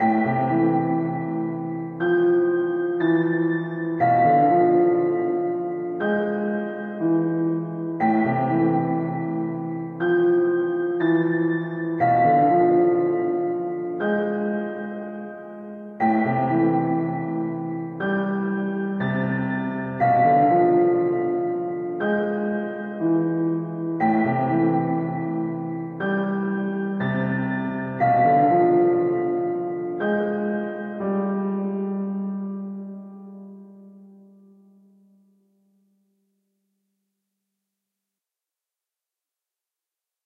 lonely piano3 60bpm
jingle, mood, movie, ambient, cinematic, soundscape, ambience, trailer, chord, interlude, music, loop, film, scary, background, atmosphere, pad, instrumental, dramatic, horror, piano, radio, suspense, dark, drama, instrument, spooky, background-sound